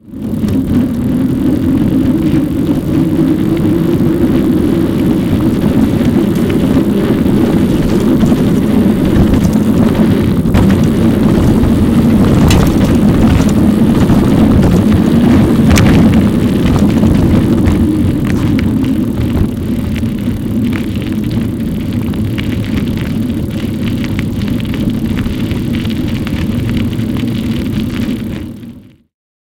Bike downhill
This is a mountain bike subjective field recording. Bike velocity download. The micro is in the bike. Micro: AUDIO-TECHNICA condenser (mono) AT835b in a DAT SONY TCD-D7. Digital transfer to PROTOOLS. Place: Sant Quirze del Valles, Catalonia, Spain.